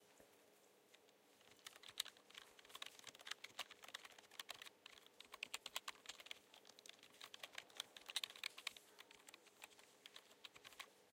Typewriter's Key
A sound produced with Foley, using a membrane keyboard typing, and then filtering the lower end to give it a more rigid and typewriting sound.
Typing, Typewriter, Key